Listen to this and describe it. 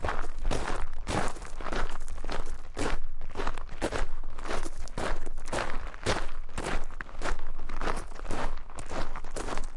Footsteps In Gravel
I recorded myself walking at a moderate pace on a gravel path.